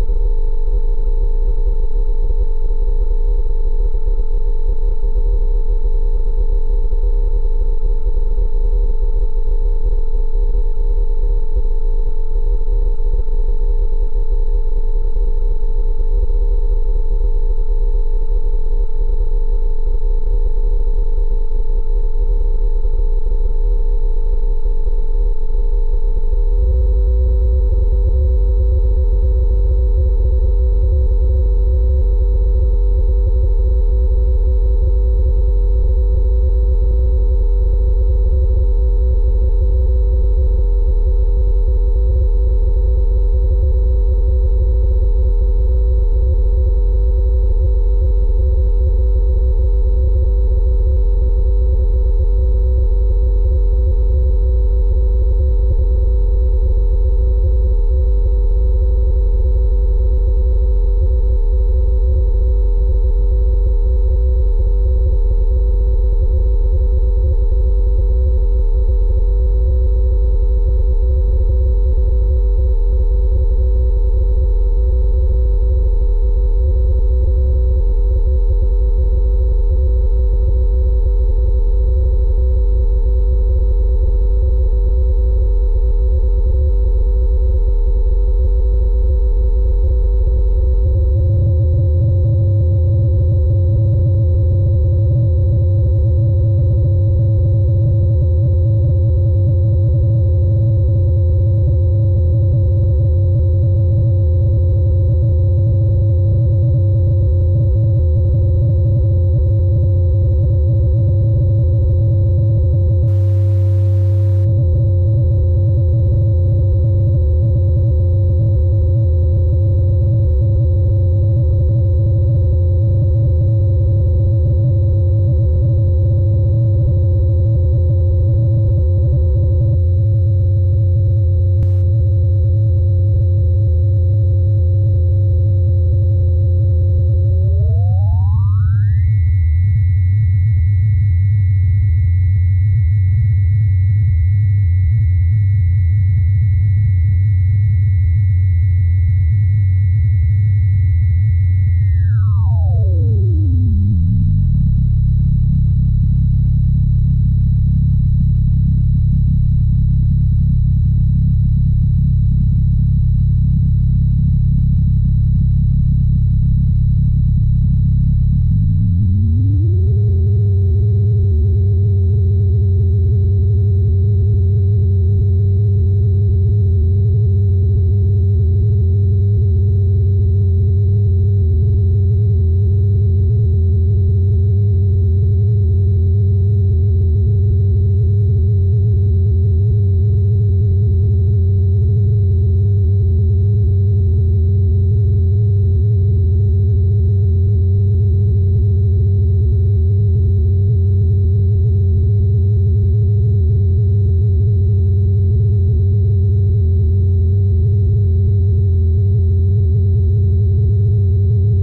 Background hum of a spaceship interior / engine room / control room / hi-tech / science fiction. Generated by sweeping frequencies on an old function generator while injecting some pink noise.